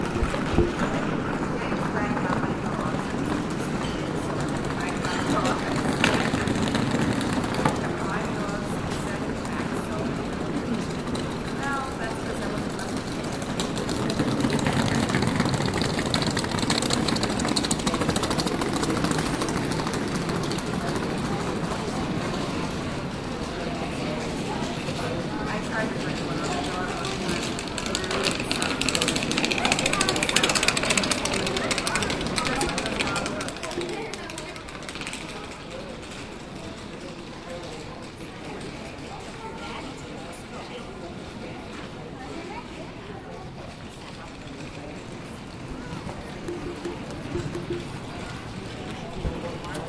ABQ-Airport-luggage-wheels-on-bricks
Airport hall room tone and luggage wheels on bricks. Zoom H4n.
Airport, hallway, luggage-wheels, room, tone, waiting-room